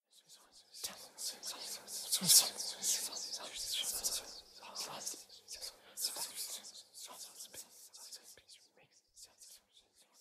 whispers-supernatural
overlayed whispers with moderate echo and delay. enjoy.